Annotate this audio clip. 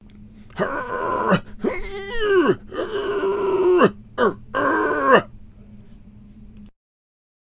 Random Grunting
Just some Heavy lifting grunts...
Dry Recording...used Zoom H5 (Wind Scock on), Multi Setting as USB Powered Mic into Garage Band.
groan, grunt, lift, man, push